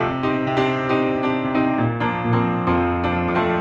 Flügel Bigbeat 02 - 132
Steinweg Grandpiano recorded at MusikZentrum Hannover / Germany via Sennheiser MD421 MK1 (bottom) and Sennheiser 2 x MKH40 (stereophonic)
written and played by Philip Robinson Crusius
loop - 132 bpm
steinweg, funk, piano, klavier, beat, steinway, bigbeat, loop